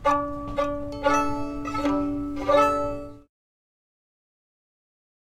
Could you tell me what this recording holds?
A violin being strumed for you to muck about with.